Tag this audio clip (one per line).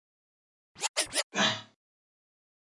rap
dj
acid-sized
hip-hop
scratch
scratch-it
scratching
hiphop